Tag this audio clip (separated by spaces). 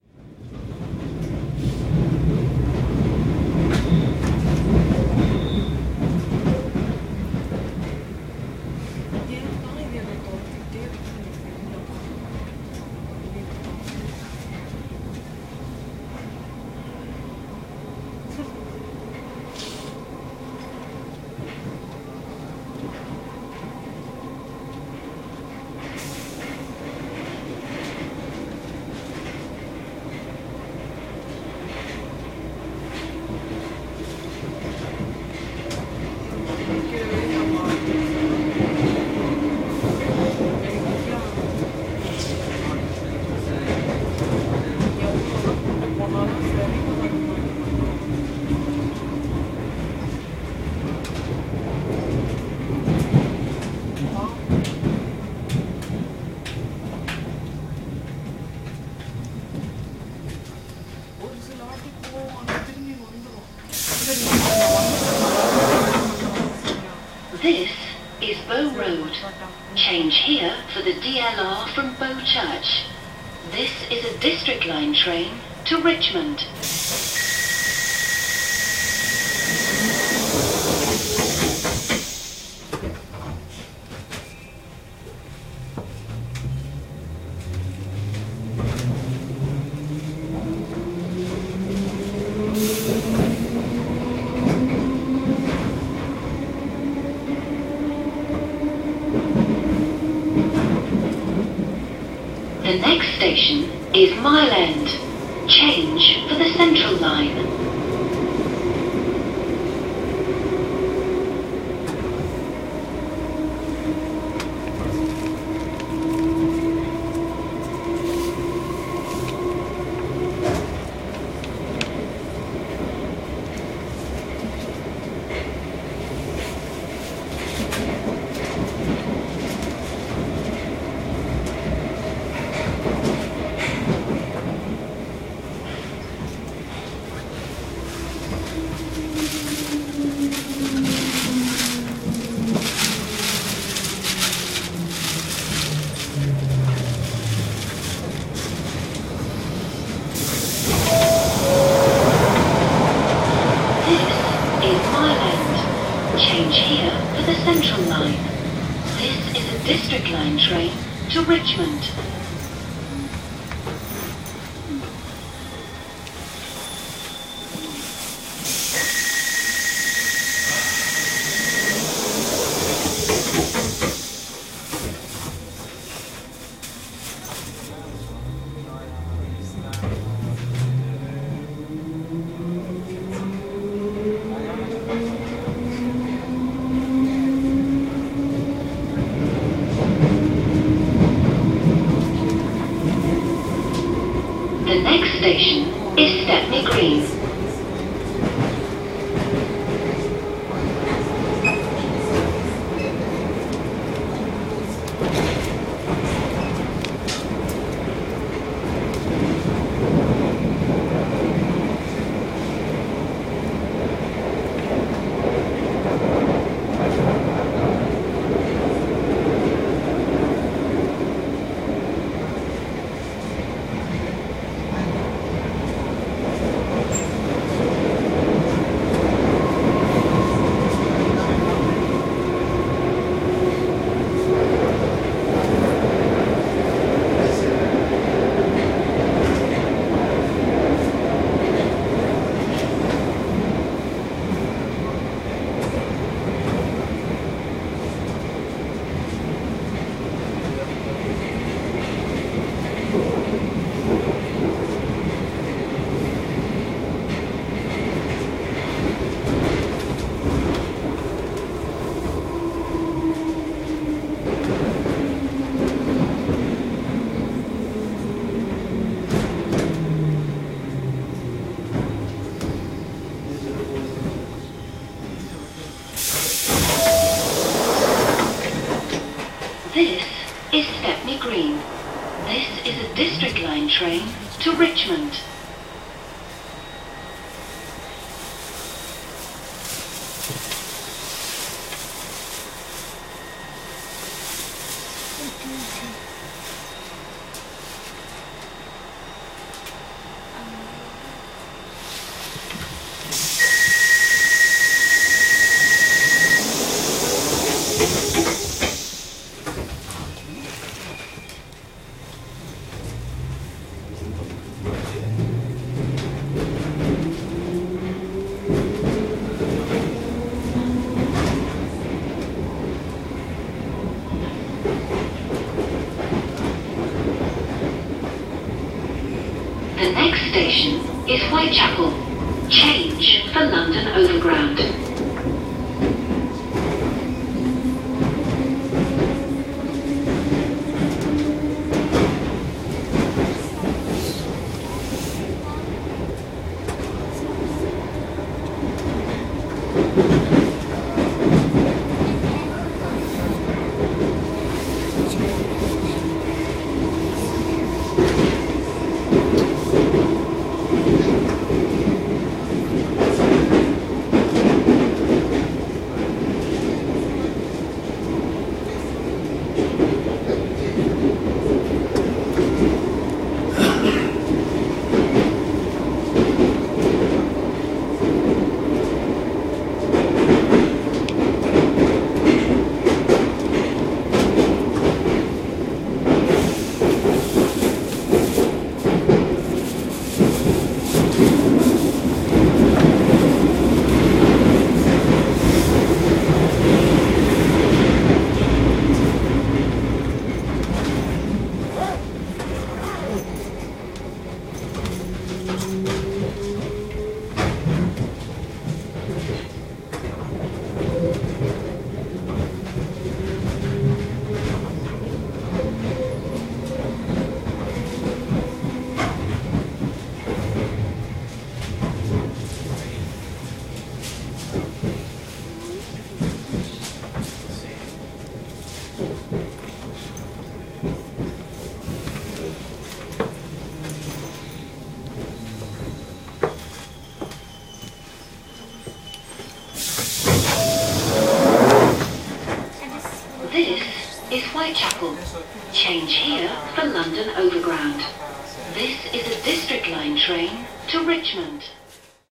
announcement bags beeps bow-road bromley-by-bow close district district-line doors field-recording london london-underground metro mile-end open richmond station stepney-green subway train tube tube-station tube-train underground voice whitechapel